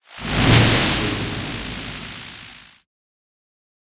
Electro Hit 01
I made this sound with Sound Forge Audition Studio and Reaper. This is one of four sounds which you can use as Lightning Spell or anything with electricity in your game.
electric, impact, mage, spell